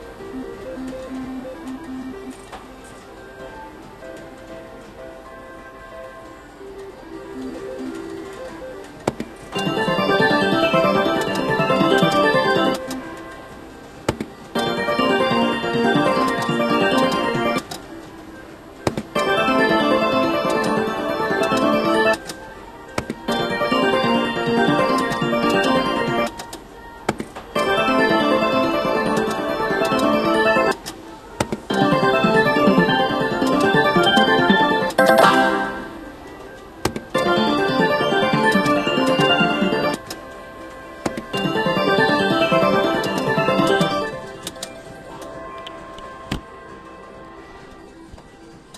WOF slots2
Casino background noises, slot machine noises, clicking,
clicking, background, noises, slot, Casino, machine